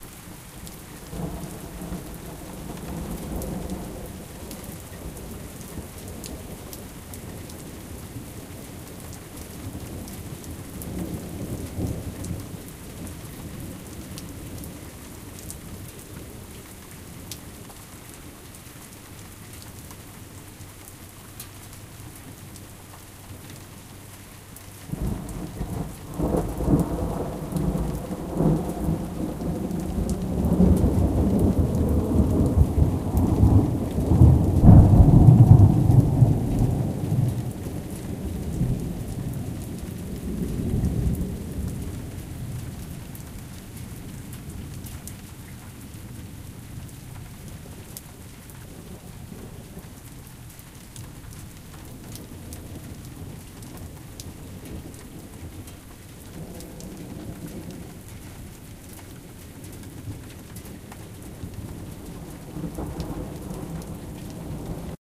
Long Rumbling Thunder
A thunderstorm with some soft rain and a lengthy rumbling thunder about half-way through- the type of thunder that will rattle everything in your house.
If you feel like saying "thanks" by sending a few dollars my way you can definitely do that!
ambiance, ambience, ambient, atmosphere, authentic, field-recording, h4n, lightning, long-thunder, nature, rain, rainstorm, rolling-thunder, rumble, soft-rain, storm, strike, thunder, thunder-storm, thunderstorm, weather, wind